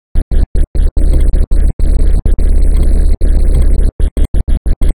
alien broadcast noise